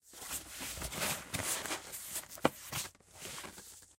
15 Cardboard Box Handling
cardboard, paper, box, foley, moving, scooting, handling,
box, handling, scooting, paper, cardboard, moving, foley